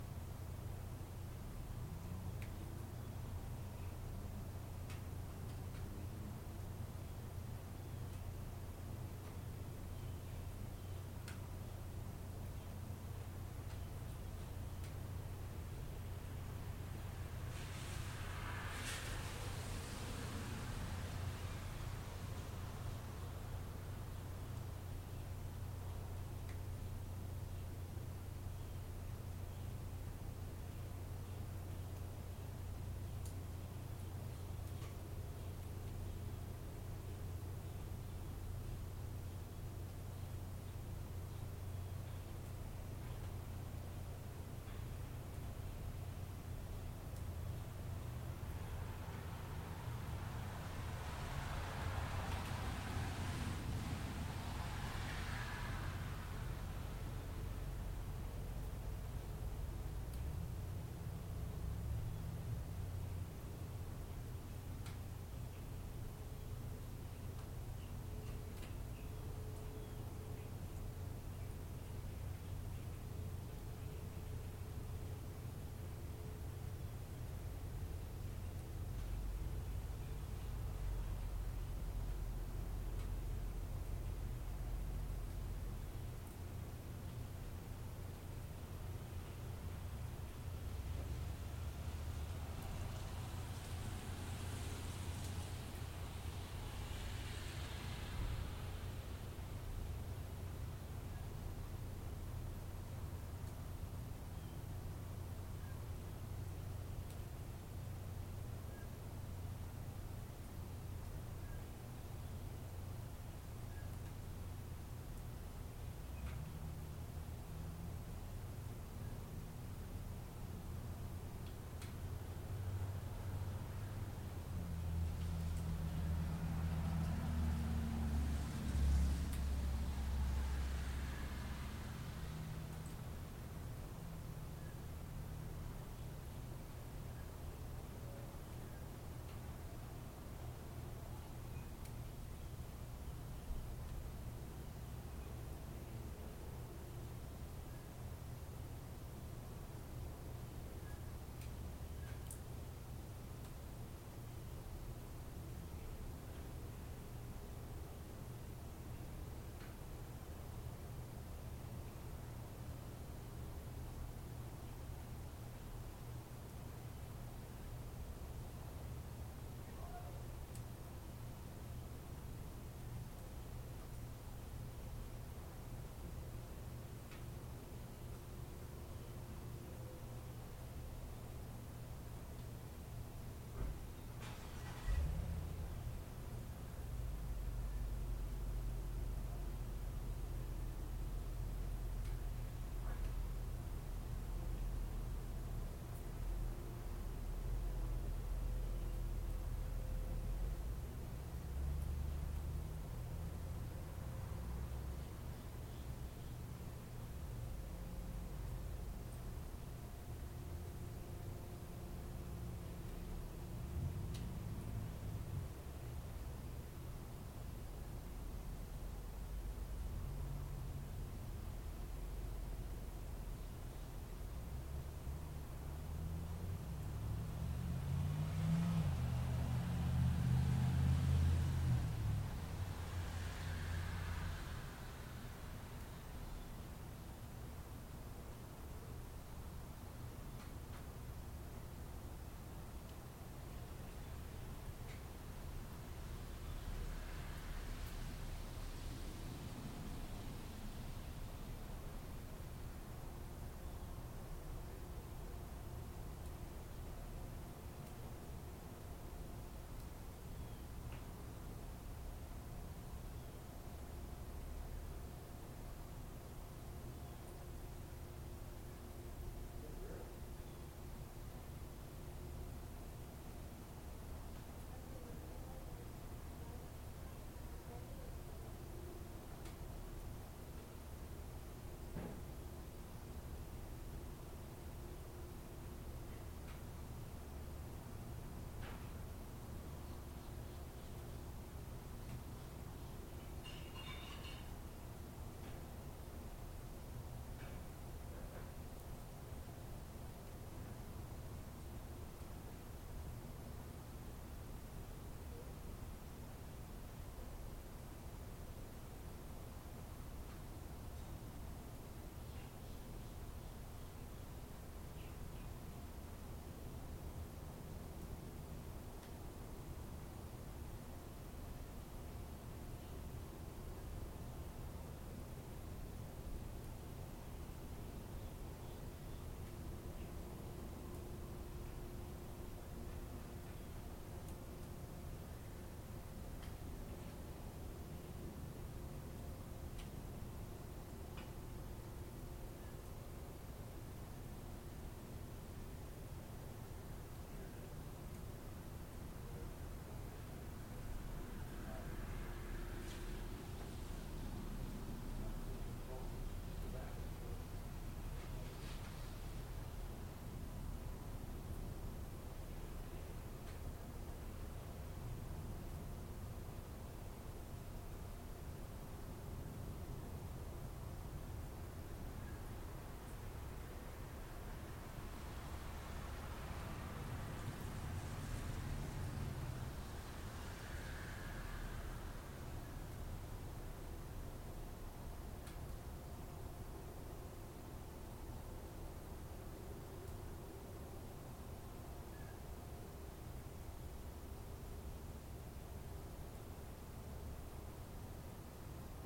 Attempting to catch that perfect thunderclap... again.